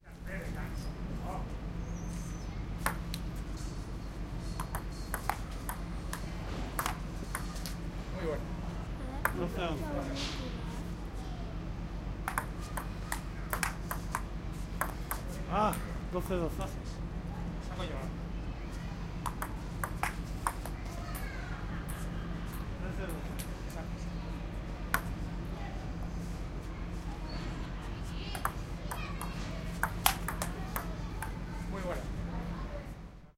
old men playing pong-pong